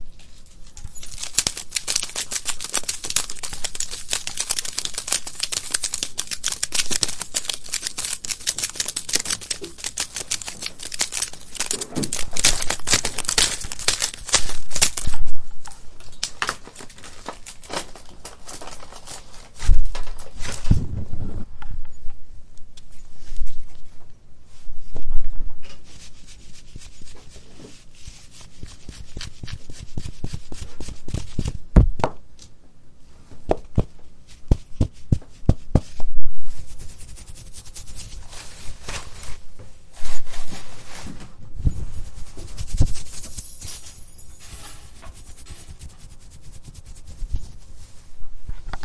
This is a collection of various sounds I recorded, including beads, lollipops, and a disco ball.